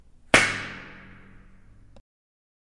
At the frayed edges of the electrified grid, barely audible and nearly covered up by the whirr and dull roar of life continuing, there lies a space whose possibilities extend far beyond what we can imagine in the present. This edge of immanent potential is a space of creative invention and political resistance.
Tunnel's Edge was recorded with a Tascam DR100mkii sometime in 2013.
field-recording, percussive, sample-pack